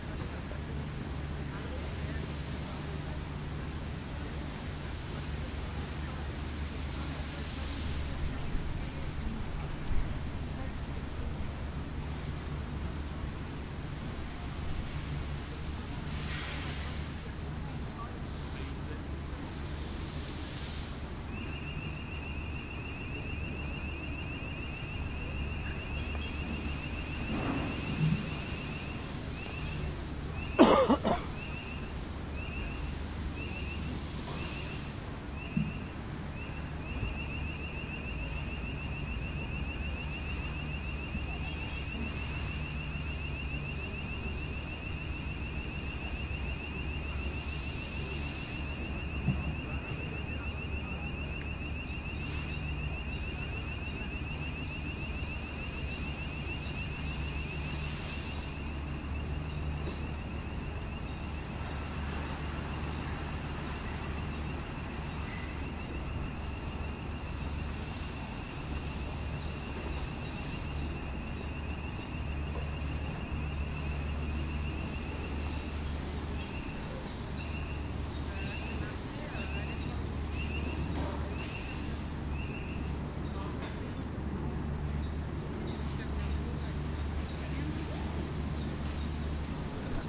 People/Tourist walking on the embankment of the River Thames at the south side of the Tower of London.